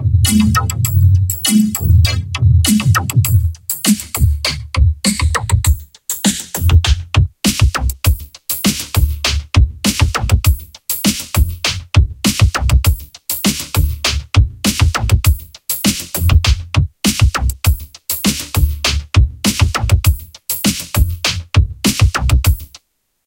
This track should be appropriate for almost any modern game. The new version of the game "Hardware: Rivals" was put into mind when creating this. I liked the unique style of that game. Perhaps you can use this track for an intro. Enjoy this relaxing but pumping beat.
Made using FL Studio.
modern, 100-bpm, loop, drum-loop, funky, drum, beat